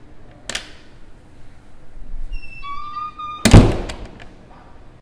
toilet entry door
Low, mid, and high frequencies sound obtained by recording the entry door opening, squeack, and closing.
UPF-CS13, bathroom, campus-upf, door, entry, toilet